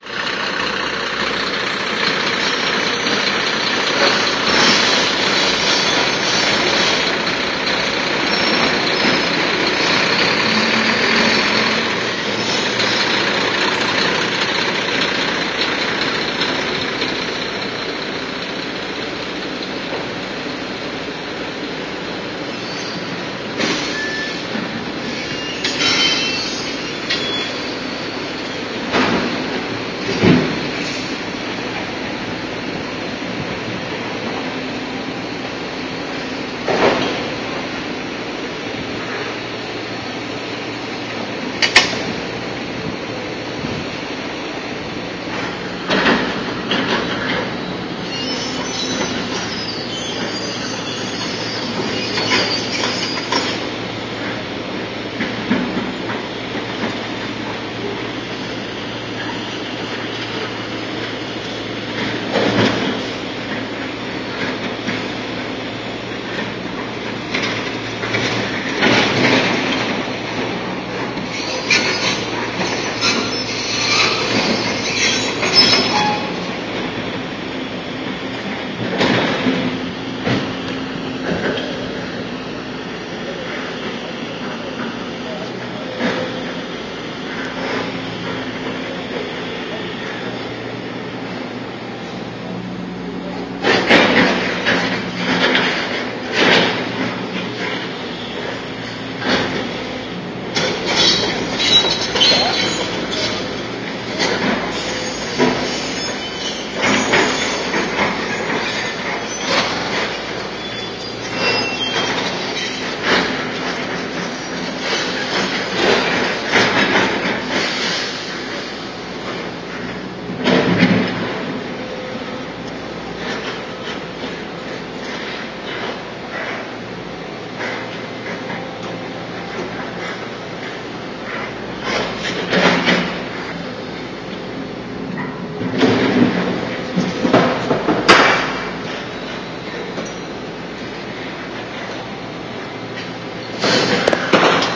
Building site 2
Another building site recording
site, Building, machines, ambience